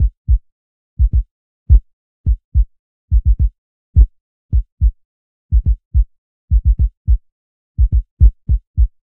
On Rd Bruce 5
Taken from a our On road Bruce project, made to go along with a slap base line. Mixed nicely
hip-hop, on-rd, On-Road